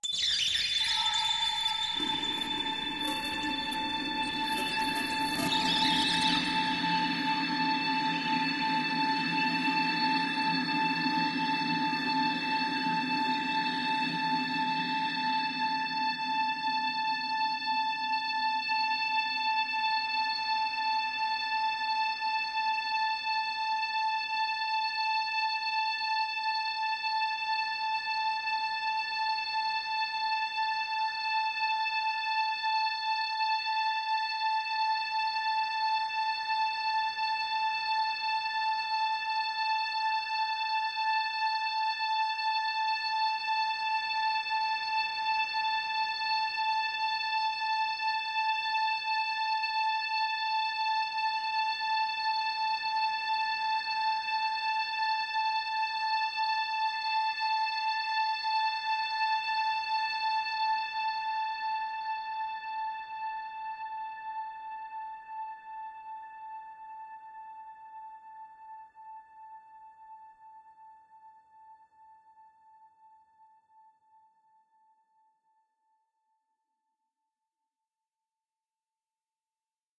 LAYERS 005 - Heavy Water Space Ambience - A7
LAYERS 005 - Heavy Water Space Ambience is an extensive multisample package containing 97 samples covering C0 till C8. The key name is included in the sample name. The sound of Heavy Water Space Ambience is all in the name: an intergalactic watery space soundscape that can be played as a PAD sound in your favourite sampler. It was created using NI Kontakt 3 as well as some soft synths within Cubase and a lot of convolution (Voxengo's Pristine Space is my favourite) and other reverbs.
drone, water, pad, artificial, space, multisample, soundscape